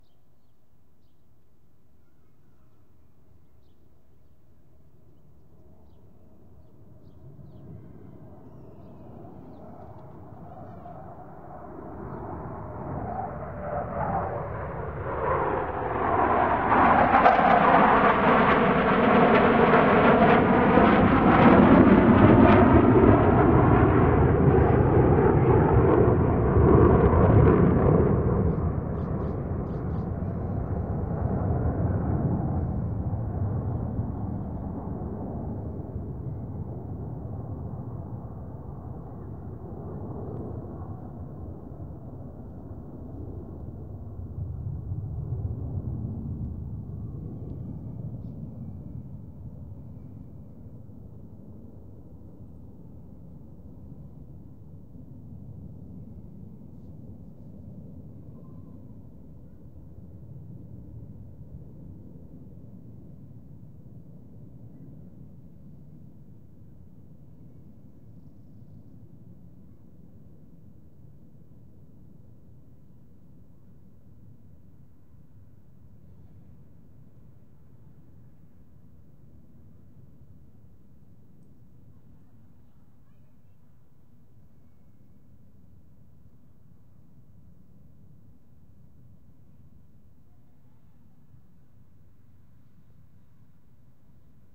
Slow-Jet-Flover
4 F-35 jets flying 1,000 feet above a suburb. The jets are moving at about 400mph. This was captured during Utah's Covid-19 statewide flyover.
Flight, Jets, Jet, stereo, Plane, F-35, Aircraft